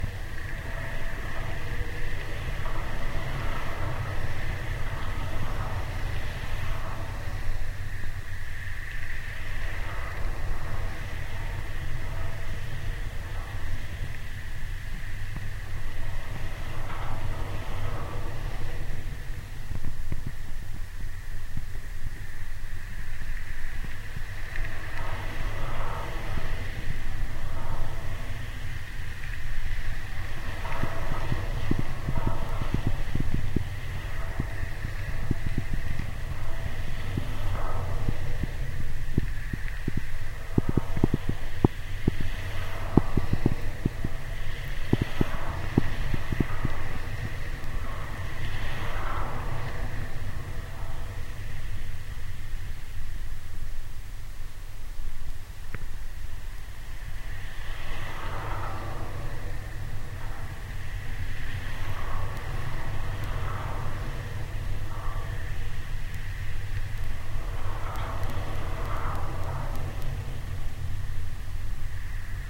GGB suspender SE28SW
Contact mic recording of the Golden Gate Bridge in San Francisco, CA, USA at southeast suspender cluster #28. Recorded December 18, 2008 using a Sony PCM-D50 recorder with hand-held Fishman V100 piezo pickup and violin bridge.
cable, contact, contact-microphone, field-recording, Fishman, Golden-Gate-Bridge, piezo, sample, sony-pcm-d50, V100, wikiGong